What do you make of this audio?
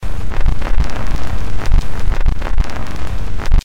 sound-design created from processing detritus with Adobe Audition
click, rhythmic, glitch, sound-design, electronic, 2-bars, processed, industrial, loop